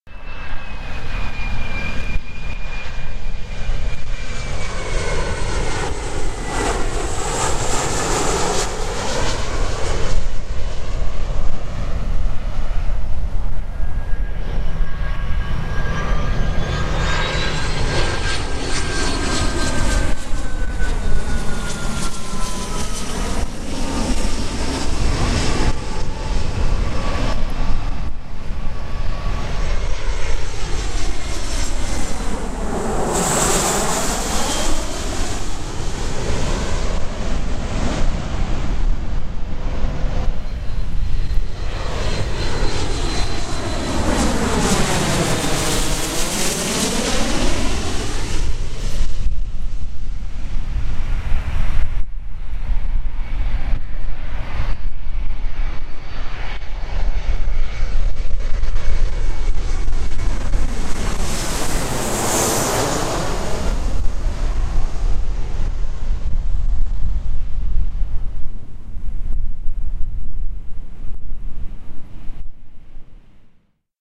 These are the sounds of commercial and private jet aircraft landing at Love Field in Dallas, Texas on Sunday, October 16th, 2011.
jets, Jets-landing, landing, Love-Field